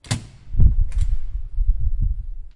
You can hear an emergency gate being opened, it sounds like a thud and then the sound of air.
This sound was recorded using a Zoom H4 recording device at the UPF campus in a corridor from tallers in Barcelona.
We added a fade in and out effect.